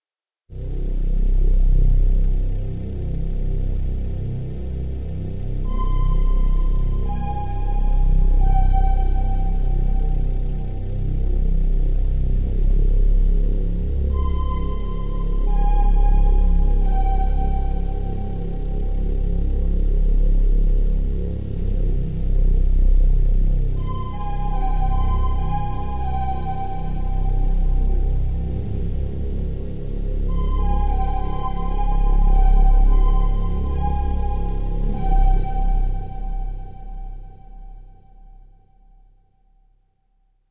cine pad4
made with vst instruments
ambience, ambient, atmosphere, background, background-sound, cinematic, dark, deep, drama, dramatic, drone, film, hollywood, horror, mood, movie, music, pad, scary, sci-fi, soundscape, space, spooky, suspense, thiller, thrill, trailer